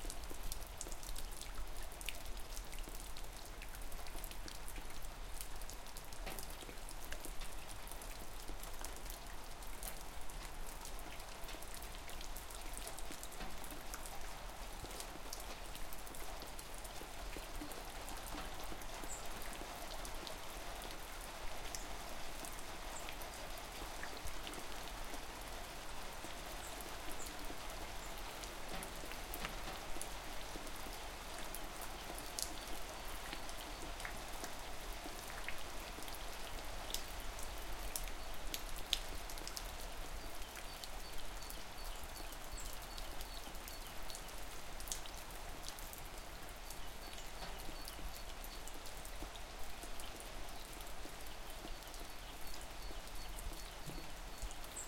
Light rain dripping.
air, birds, dripping, light, rain